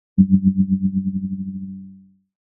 2. SPACE 1999: Sliding Doors (32Float Wave)
Space 1999: Sliding Doors
NOTE:
Sound remade within Reaper 6.26 with Native Plug-ins and automations.
The sound is not grabbed from any existing video.
It's fully redesigned and rebuilt to "sound like"